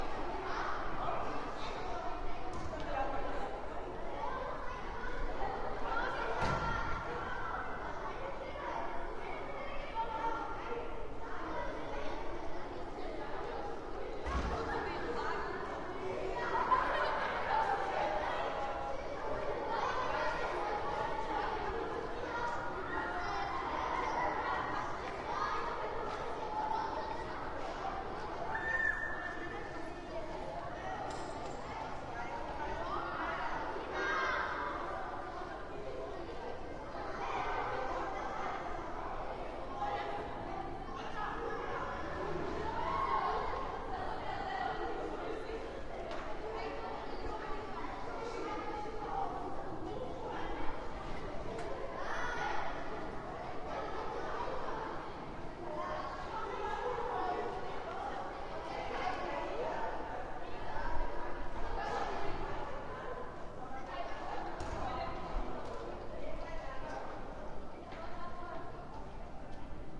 Children - School courtyard 1
Many young childrens/pupils playing and talking (german) in a school inner courtyard in Berlin-Neukölln